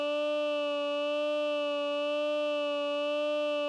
The vowel “A" ordered within a standard scale of one octave starting with root.
formant, speech, robot, vowel, supercollider, voice, a